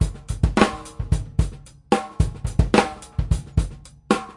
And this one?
Hihat5 2m 110bpm
Acoustic drumloop recorded at 110bpm with the h4n handy recorder as overhead and a homemade kick mic.
acoustic, drumloop, drums, h4n, loop